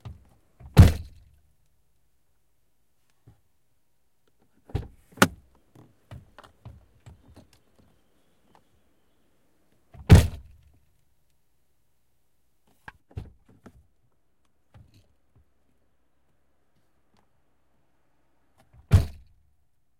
Audi A3 open:close door internal
close, audi, door, open, internal